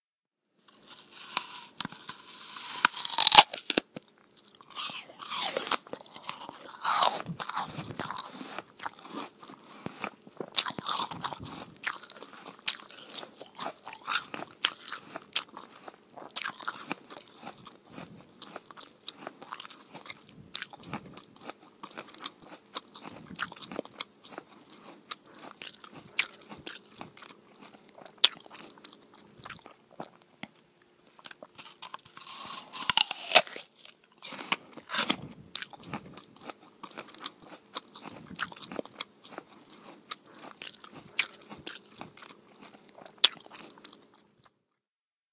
eat apple
Eating a very crunchy, juicy apple.